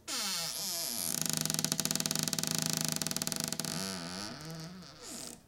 cupboard creak 7
A long creak with interesting tone, you can hear the individual clicks as it opens.
creak, squeak, cupboard, kitchen, hinge, door